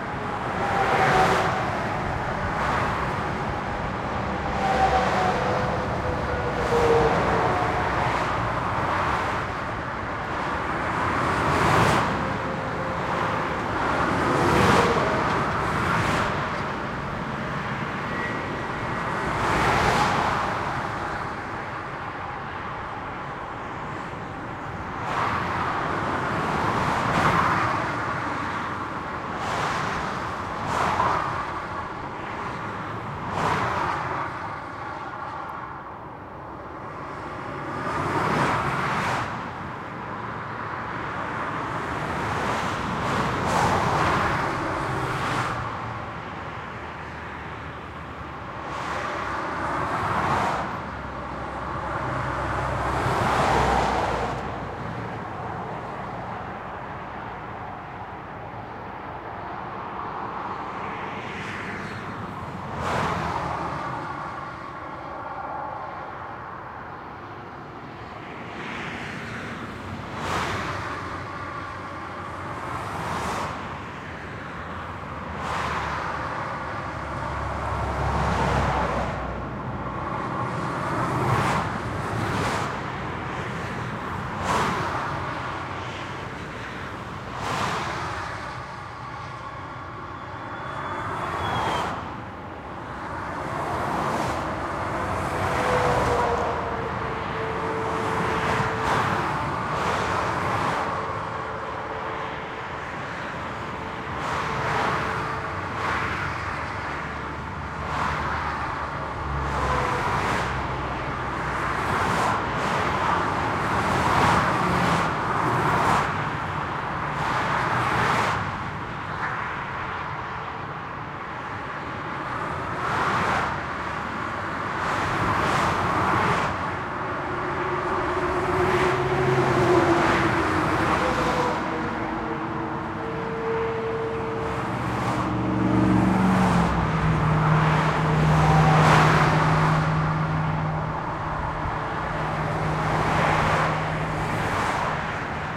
4ch field recording of an overpass over a German motorway, the A38 by Leipzig.
The recorder is located on the overpass, approx. 5 meters above the median strip, front mics facing outward toward the motorway. The traffic is moderately heavy, with a good mix of cars and trucks emerging from under the overpass or driving under it.
Recorded with a Zoom H2 with a Rycote windscreen, mounted on a boom pole.
These are the REAR channels, mics set to 120° dispersion.